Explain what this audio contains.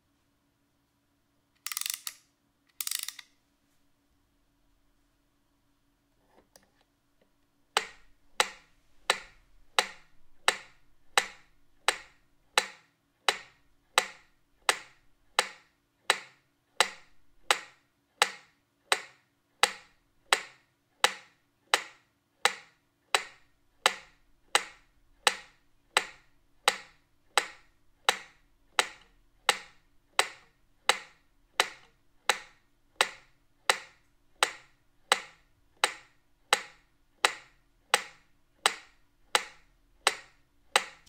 Setting up a metronome and click on cca 60 BMP in medium room.
metronom, metronome